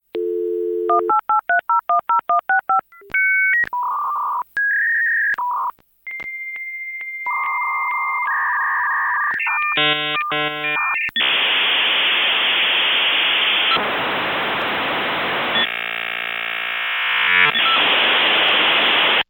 Dial-up sound
uhq version of dial up noise
internet, dialup, up, phone, connection, dial, modem, 56k, uhq